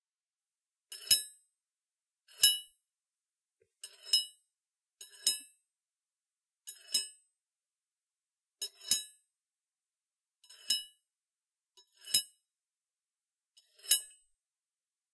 metal-sliding-several-times
Sliding a metal thing across a file: to mimic a metal lock of a box or something